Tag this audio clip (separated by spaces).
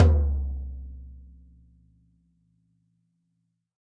bit erkan hard kick medium soft